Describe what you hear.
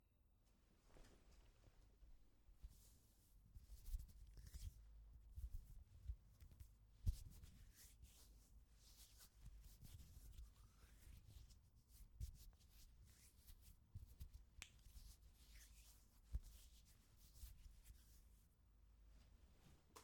hands rubbing skin